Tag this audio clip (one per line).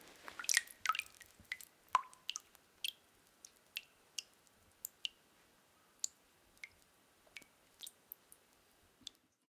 falling
water